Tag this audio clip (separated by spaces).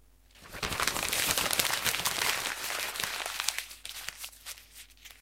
crumbling paper